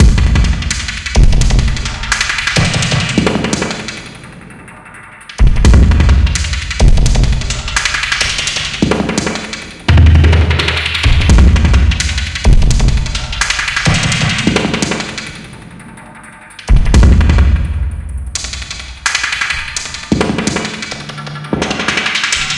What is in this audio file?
Loop without tail so you can loop it and cut as much as you want.